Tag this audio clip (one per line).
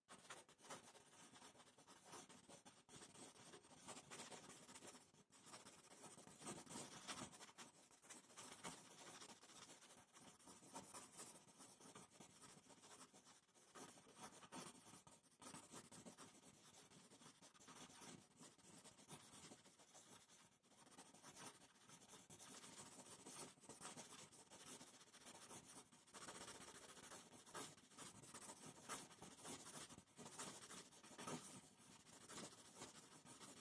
writing
lines
fast
pencil
quick
paper
words